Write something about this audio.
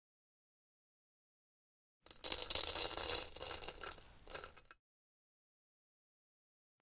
cat pouring food into a bowl
pouring cat food into a bowl
close perspective, small room